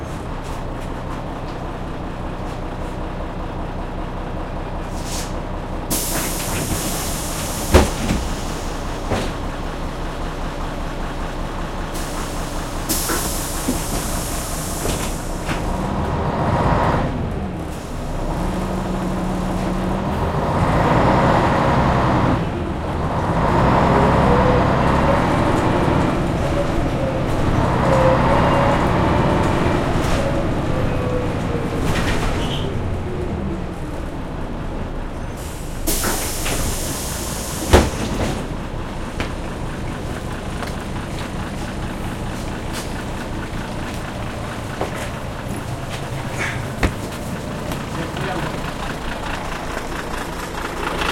Bus Polish 'Jelcz'
Interior of Polish bus 'Jelcz'
autobus, transport